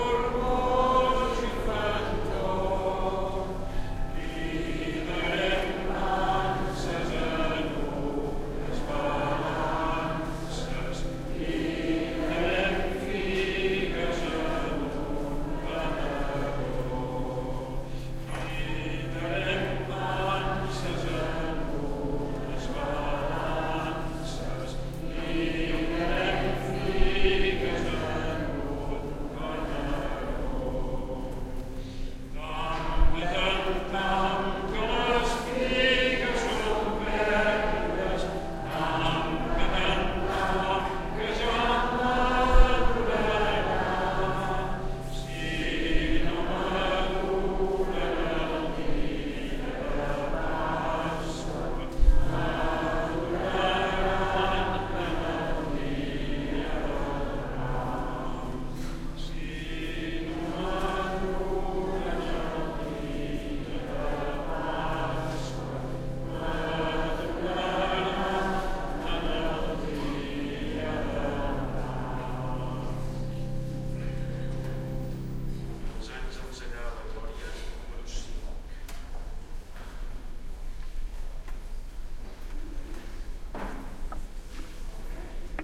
sagrada familia cathedral midnight mass 2
atmosphere, cathedral, church, ambience